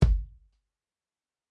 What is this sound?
This kick drum was recorded with a Shure Beta 52 and a Yamaha SKRM-100 Subkick.